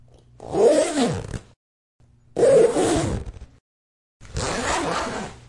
Various zipper sounds in stereo. Can be used for unzipping or zipping up a bag.
backpack, bag, unzip, unzipping, zip, zipper, zipping